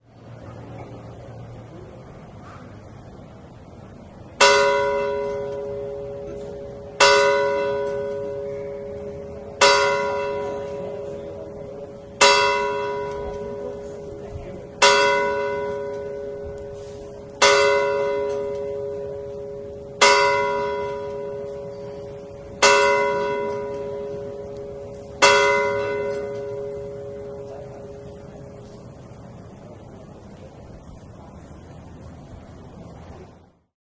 bells SMP close
Bells of the small church of Sant Marti de Provençals (Barcelona). Recorded with MD Sony MZ-R30 & ECM-929LT microphone.
barcelona, bells, small-church